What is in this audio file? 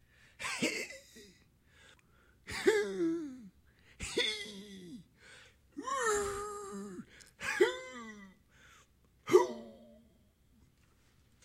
gasps effort
gasping like making effort or receiving a punch in the belly.
breath, breathing, effort, gasp, heavy